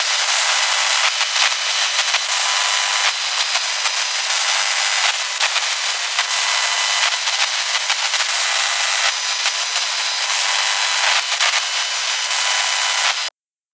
Glitch & Static 01
This is a recording of the internal components of my computer using my Audio-Technica AT8010.
Glitch, Sound-design